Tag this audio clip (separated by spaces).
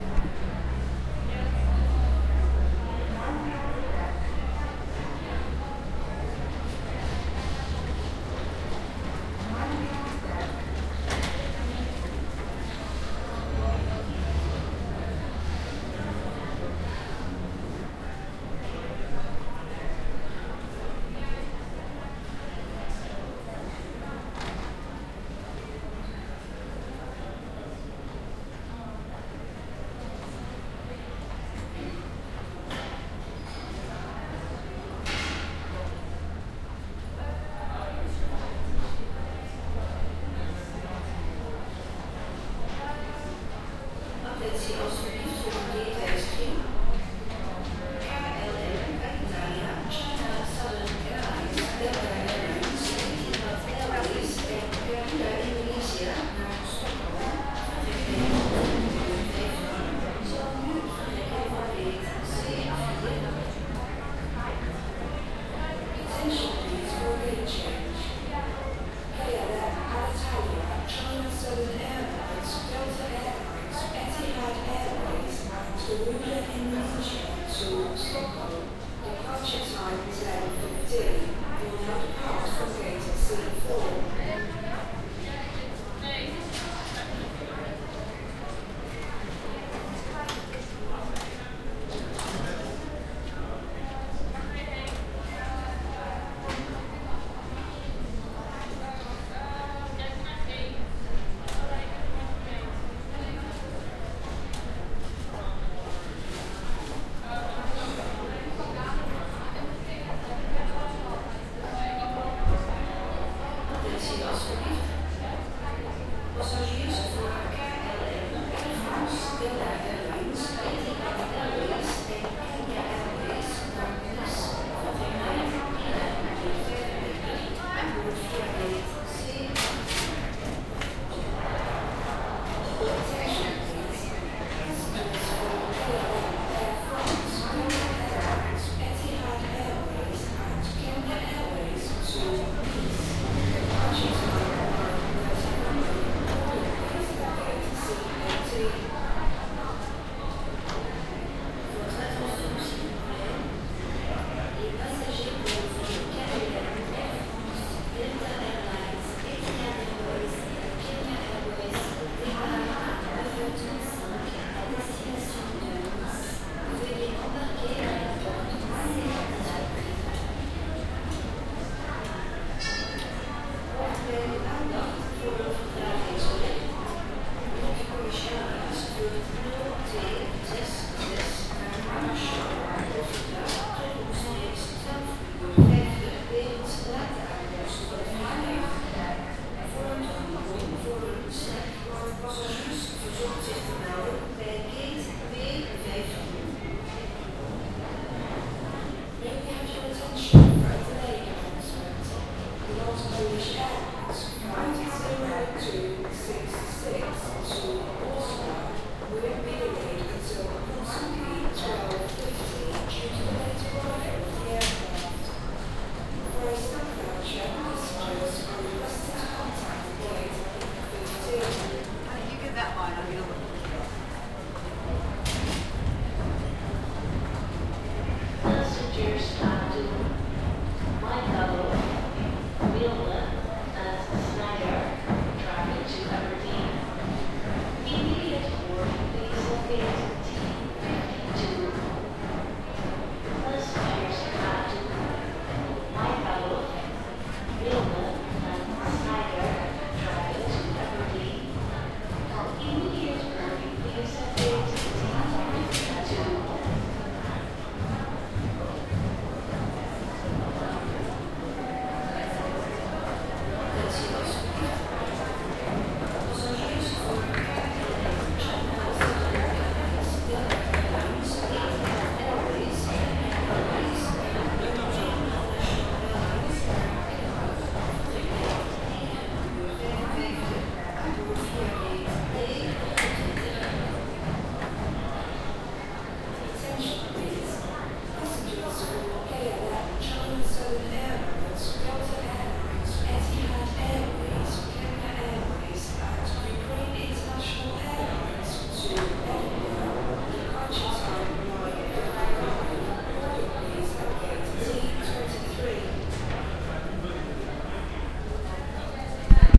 airlines airport ambience amsterdam announcement call field-recording flight gate h1 schiphol zoom zoomh1